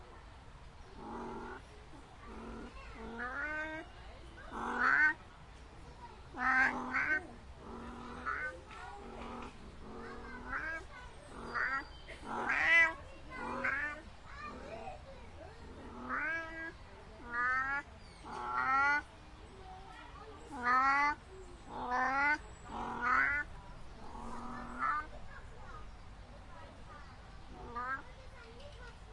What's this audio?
Rutting call during mating season of a European wildcat in the Duisburg Zoo, Germany. Zoom H4n